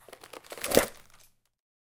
Police 357 Pistol Unholster
Recording foley is great. I'm still rather new to it but I really like doing it. Here's a holster foley I recorded with H4N Pro in my room with a cowboy holster and a 357. Hope you enjoy.
Cowboy, Gun, Firearm, Holster, Weapon, Pistol, Unholster, Leather